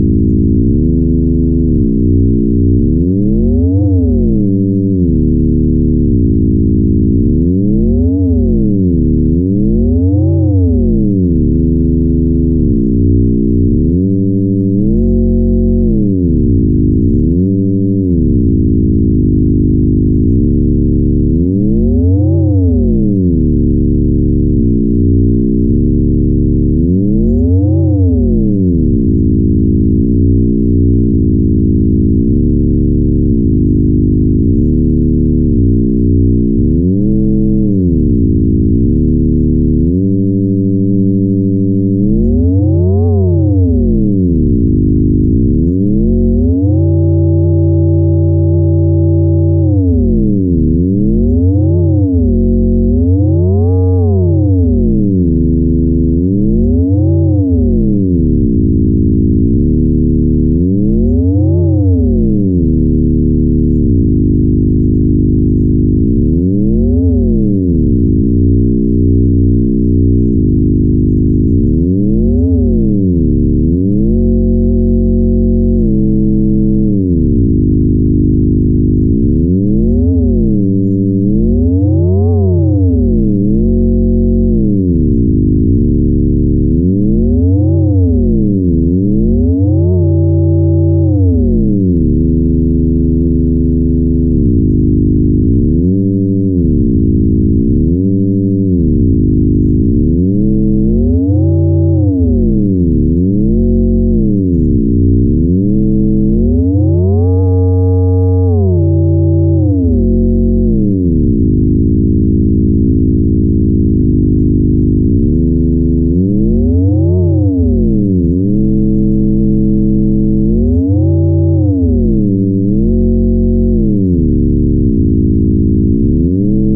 modular noises random
Doepfer A-100 modular system recorded with a Zoom H-5.
Cut and transcoded with Audacity.
analogue, effect, Eurorack, fx, modular, noise, noises, random, retro, sci-fi, SciFi, short, synth, synthesizer